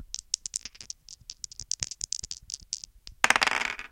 Dice Rolling - Dungeon and Dragons
Two dices rolling. (dungeon and dragons dice, d12 and d20.)